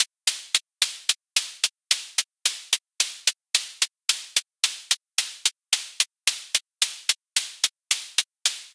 Tight open/close hi-hats in an 8th-note pattern.
110bpm, drumloop, hihat